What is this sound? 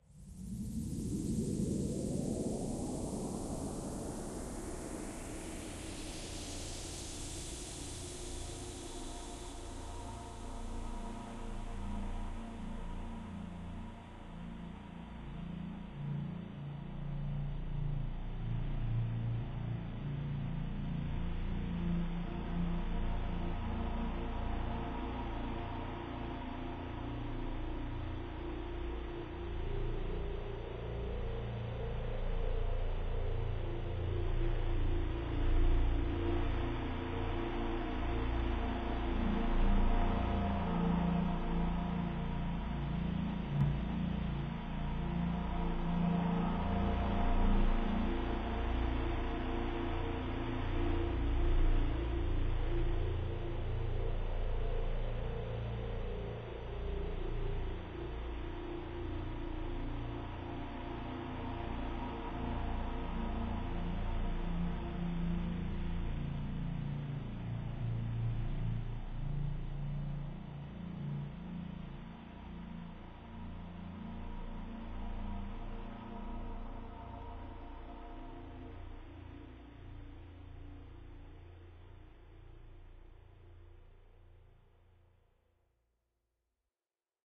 Exosphere Elevator
A mellow atmospheric loop with a spacey cosmos feeling
atmospheric, cinematic, electronic, horror, suspense